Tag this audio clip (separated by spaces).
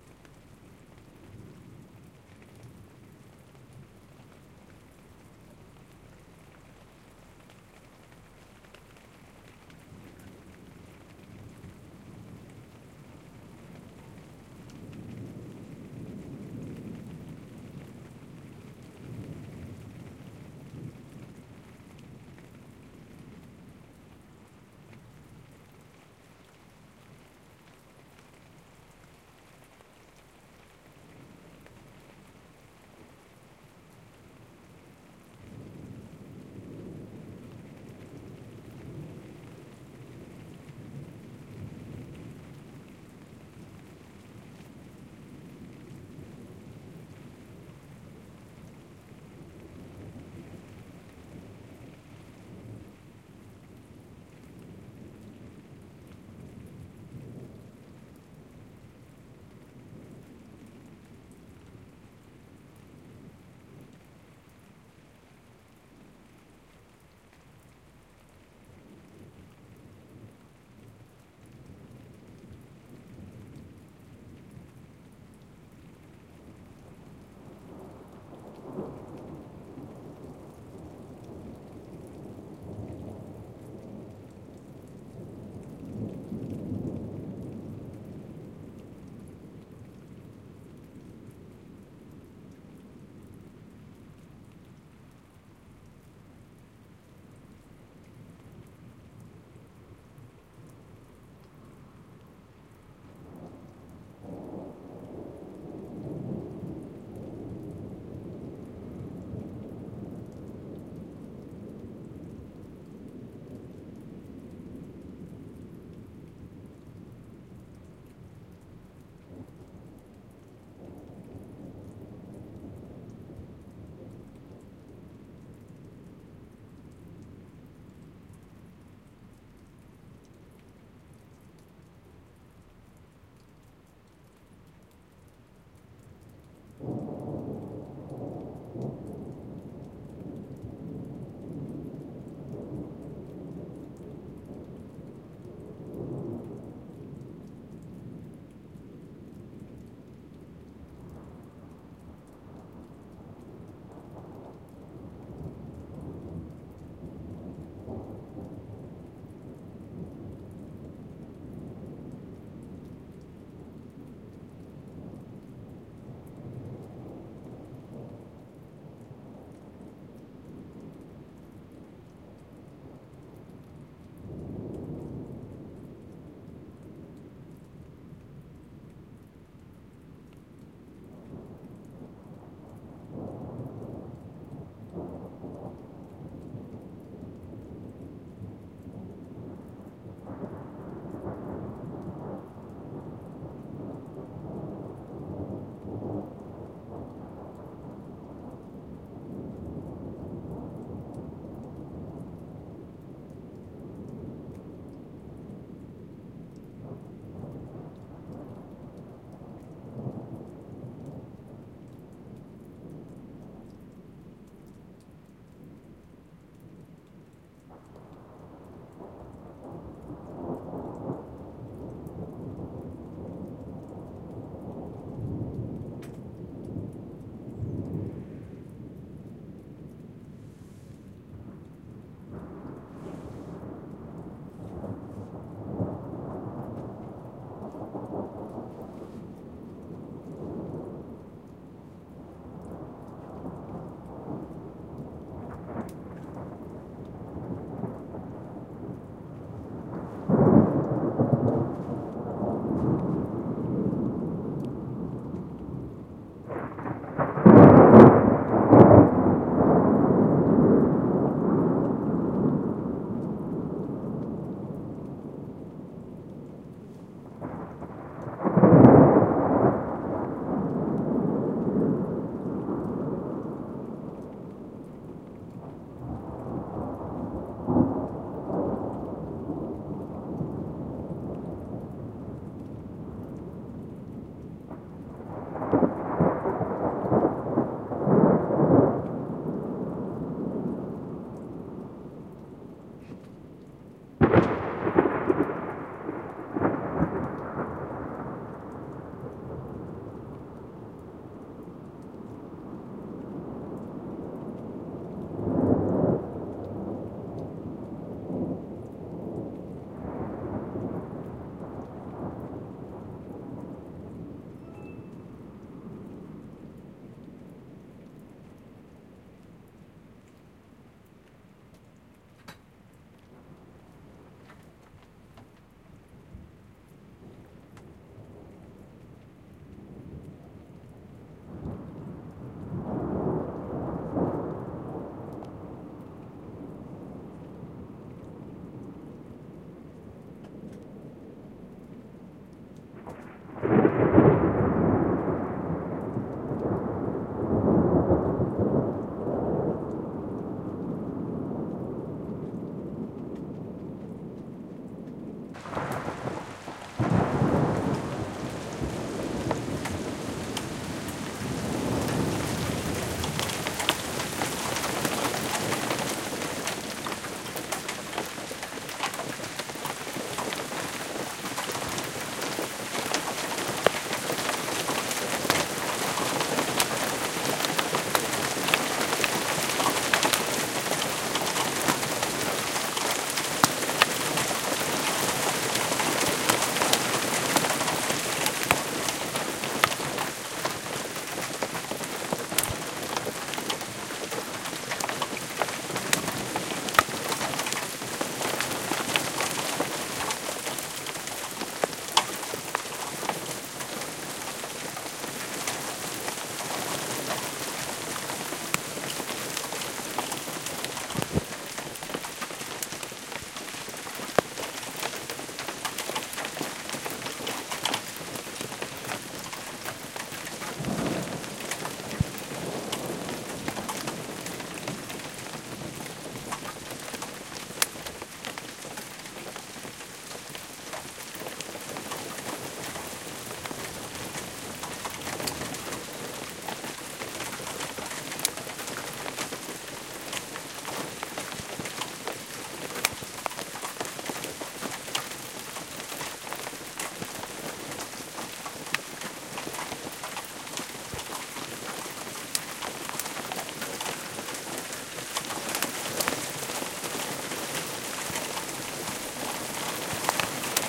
rain thunder hail lightning